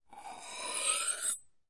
knife sharpen - slow 01
Sharpening a knife slowly.